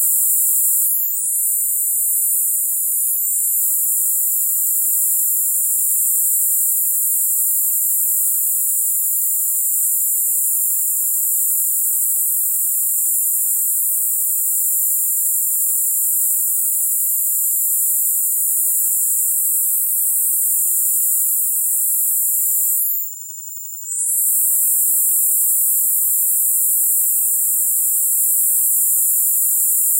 audiopaint cicadas 2 copy
Cicadas-like sound using Audiopaint. Version 2
insects, synthetic, cicadas